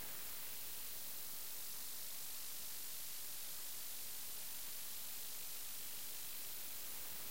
noise AM radio
Part of a collection of various types and forms of audionoise (to be expanded)
dub, glitch, noise, noise-dub, silly, soft, sweet